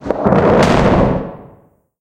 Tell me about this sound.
Explosion gMPnaYlErS0
Note that while the video uploader may not be a soldier, the video material was made by an US Army soldier during duty.
bash, turret, boom, explosion, bang, bam, tnt, shot, explode, tank